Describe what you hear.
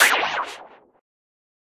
layered, filtered, timestretched, percussion.
percussion, weird